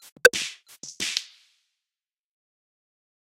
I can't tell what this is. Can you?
weird 7 loop180
A weird glitchy, bleepy loop, made on FL studio.